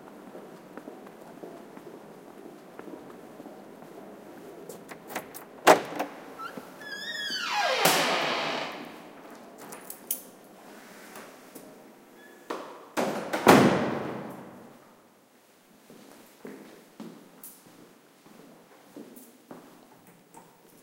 Squeaky door opens to a reverberant doorway hall, then closing and a few footsteps. Soundman OKM mics into Sony PCM M10